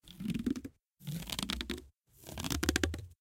Leather Bow Stretch
leather, stretch